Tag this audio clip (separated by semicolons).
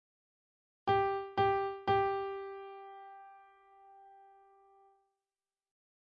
piano; g